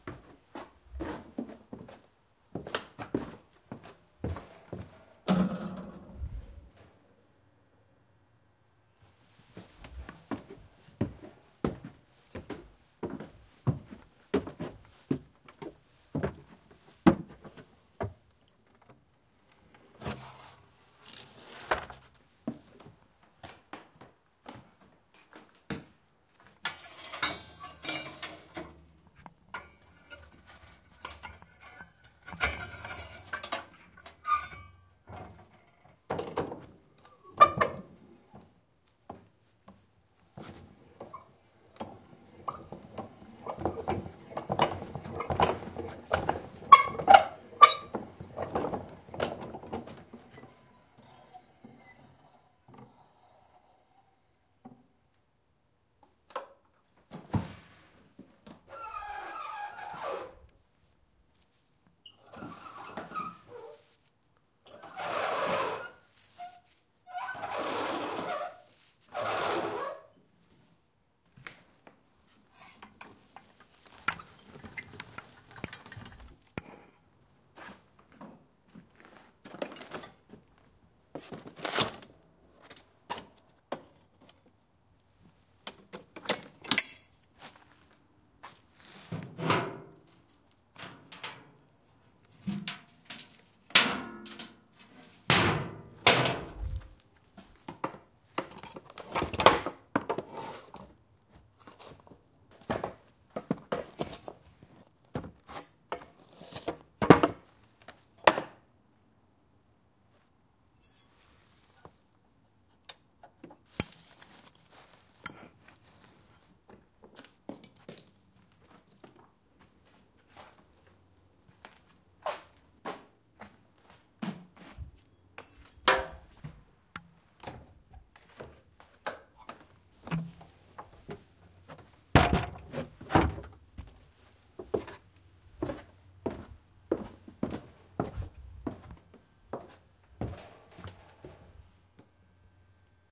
Ascending to the second floor in my grandmother's barn. Assortment of thumps and squeaks, including a horribly loud, squeaky pulley and a pedal-operated flywheel thing, and some good footsteps going up and down a wooden staircase.